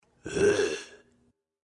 Zombie Grunt
Sci-Fi, Grunt, Zombie